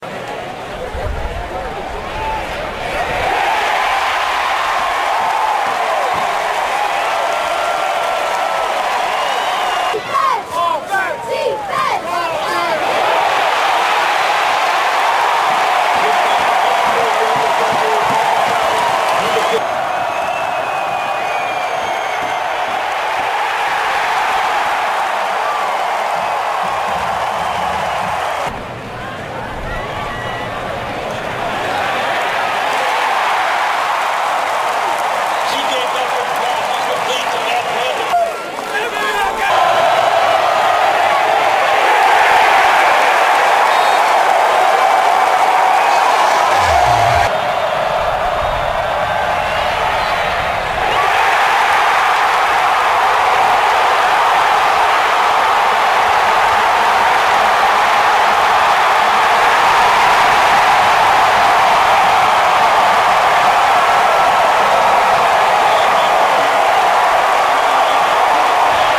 Ground-level sound recorded at a Big 10 College Football Game with stadium crowd erupting during big plays, cheerleaders, whistles and pads
Referee, Football, Hawkeyes, Big10, Cheerleaders, Whistle, Iowa, Cheers, Crowd, College-Football, Cheering